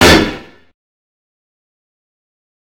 Digi gun1
hi-hat distorted
fx, harsh